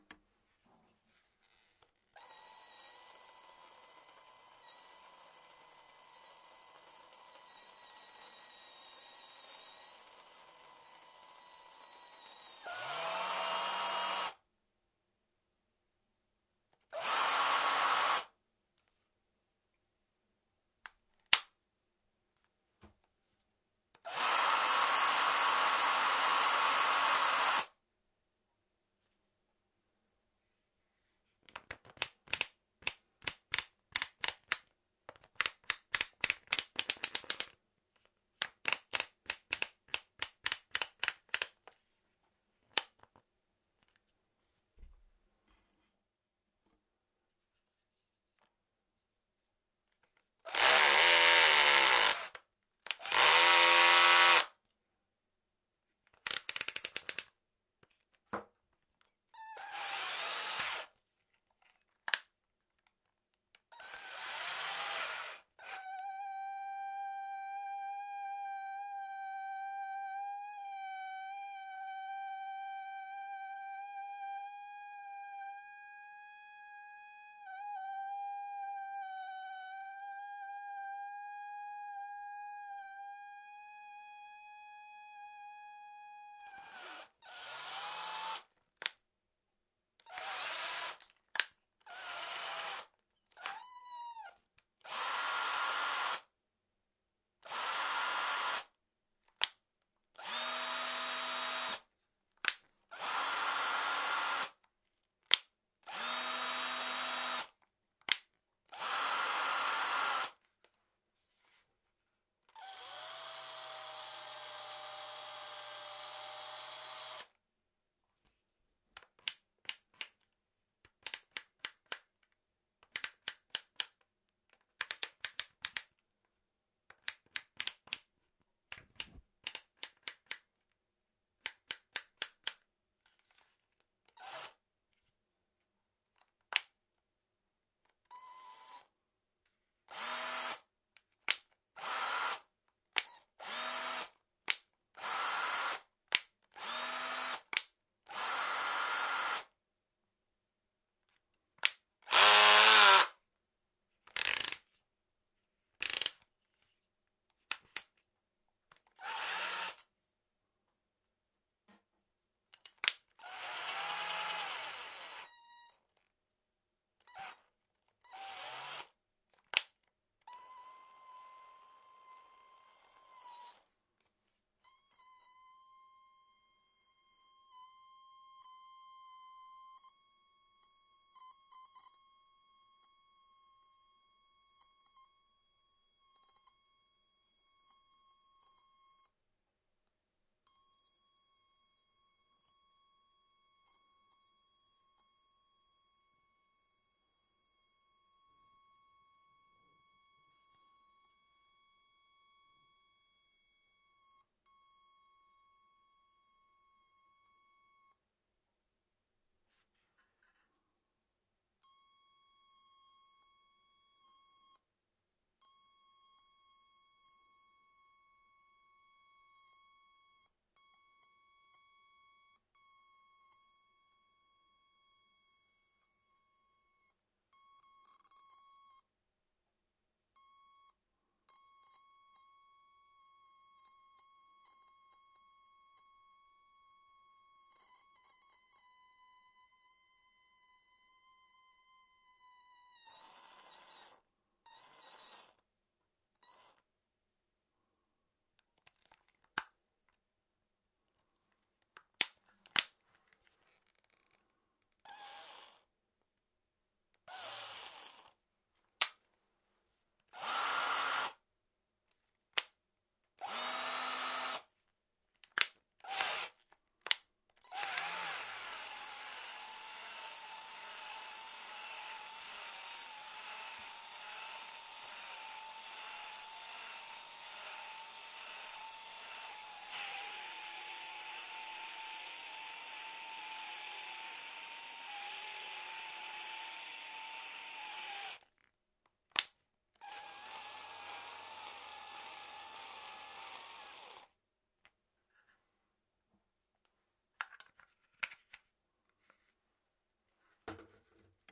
camera ST
Camera clap up mirror, click, autofocus. electric motor is usable for robotsound
5DMKII, automatic, camera, click, eos, lens, photo, photography, robot, shutter